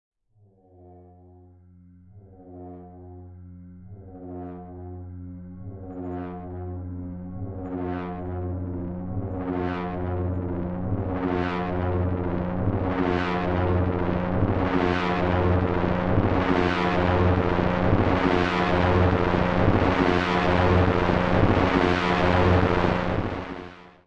Foreboding doom
atmospheric scary sounds